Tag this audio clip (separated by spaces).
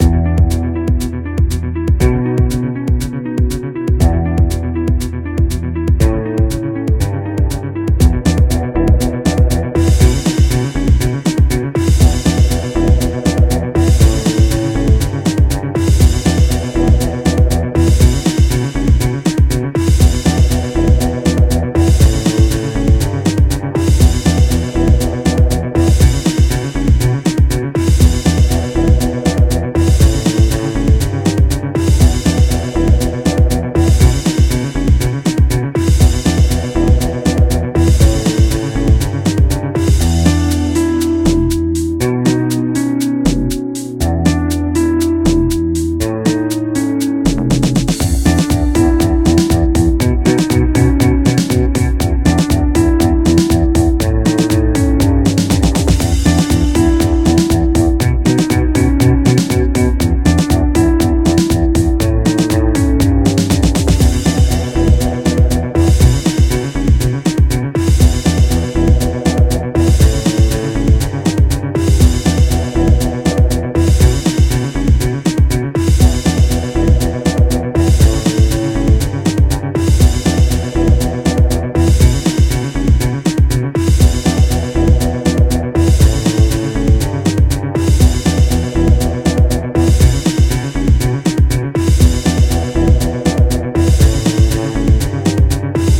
background fantasy fragment game loop music suspense